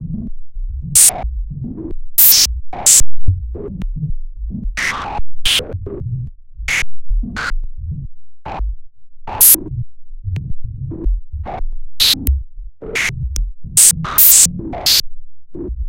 Random Fliter Noise
Random noises from Sylenth 1 adjust your headphones